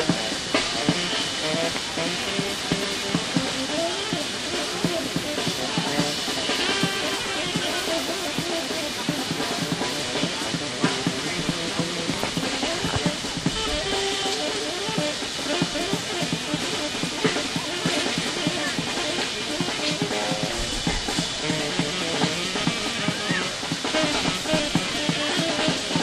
nyc loopable monowashjazz fountainperspective
Monophonic loop from ambiance recorded in Washington Square in Manhattan while a saxophonist and a drummer improvise and the fountain hosts strange modern art performers recorded with DS-40 and edited in Wavosaur.
fountain, jazz, monophonic, field-recording, washington-square, new-york-city